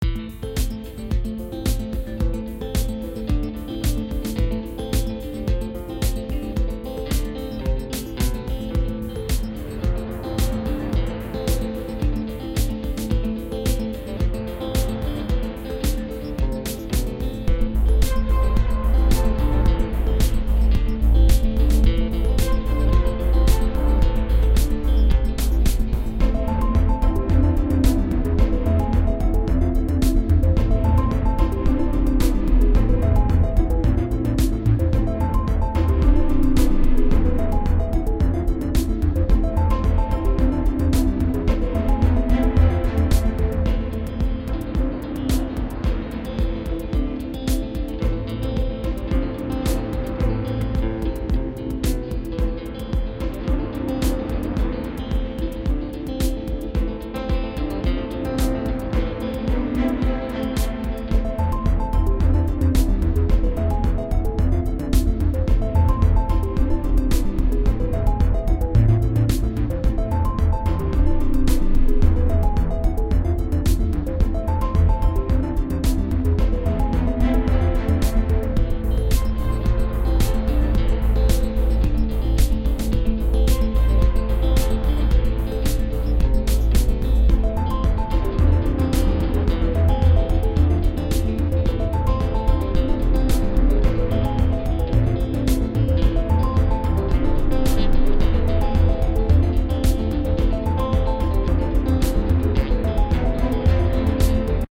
Music Loop I made, its faster beat and I think pretty sweet! It was made using An Ipad, garageband, various loops and whatnot. Some instruments provided are from myself, and also the smart instruments on garageband.
techno, trance, videogame, dance, music